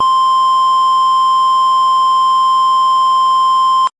LR35902 Square C7
A sound which reminded me a lot of the GameBoy. I've named it after the GB's CPU - the Sharp LR35902 - which also handled the GB's audio. This is the note C of octave 7. (Created with AudioSauna.)
fuzzy, square, synth